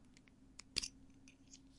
Verschluss Textmarker
Highlighter sounds. Recorded with a Neumann KMi 84 and a Fostex FR2.
marker, highlighter, click